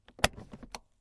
Handbrake Release
Releasing the handbrake in the car.
parking, automobile, release, auto, brake, pedal, car, handbrake, pull, hand, park